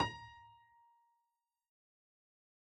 a# octave 6
notes, piano, octave6